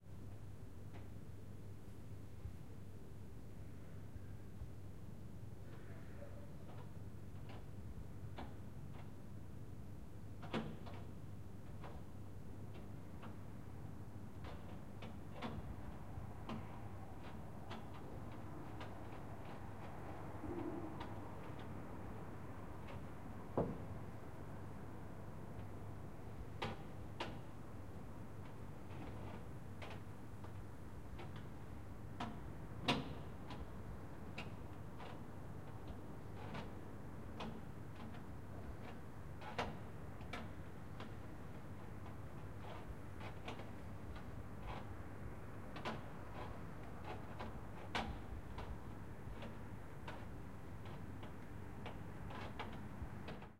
Residential staircase window rattle